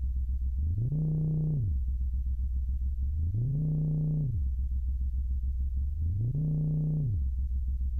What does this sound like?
A series of sounds made using my wonderful Korg Monotron. These samples remind me of different science fiction sounds and sounds similar to the genre. I hope you like.
Electronic, Futuristic, Machine, Monotron, Sci-Fi, Space, Space-Machine